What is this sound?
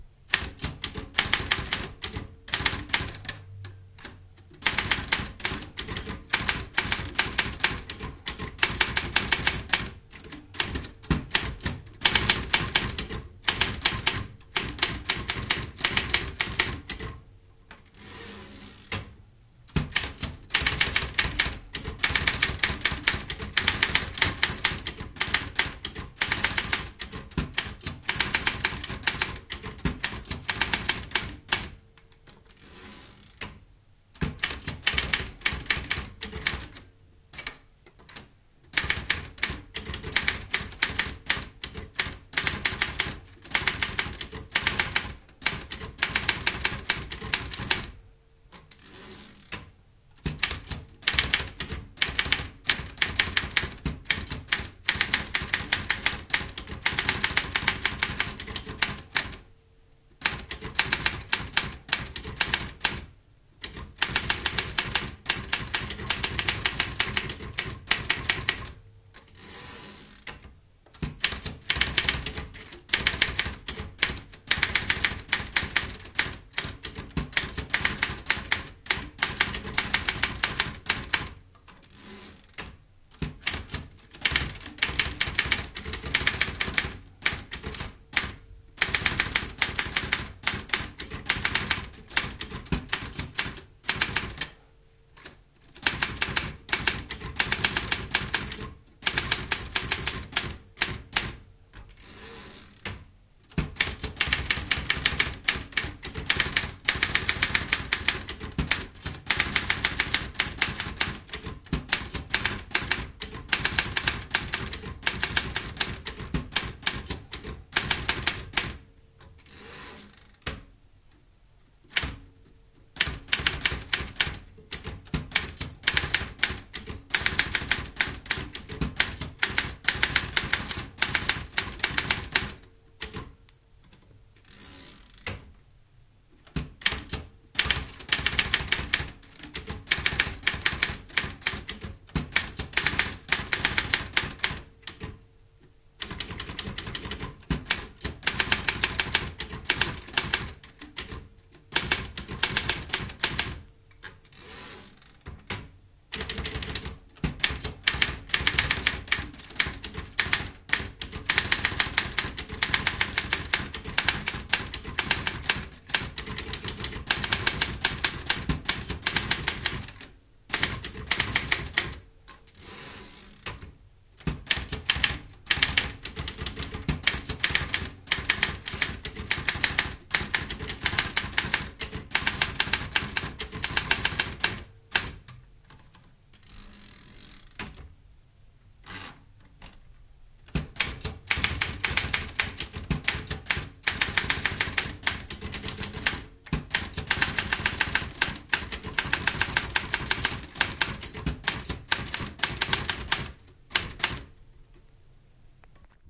Kirjoituskone, vanha, mekaaninen / An old manual typewriter, typing, typewriter carriage return by hand
Vanha kirjoituskone, kirjoitusta lähellä. Rivin vaihto käsin kahvasta vetämällä.
Paikka/Place: Suomi / Finland / Helsinki
Aika/Date: 26.06.1972
Field-Recording, Typewriter, Konekirjoitus, Type, Mekaaninen, Yleisradio, Finnish-Broadcasting-Company, Suomi, Kirjoituskone, Soundfx, Manuaalinen, Mechanical, Finland, Tehosteet, Manual, Yle, Handle, Typewriting, Typing, Kahva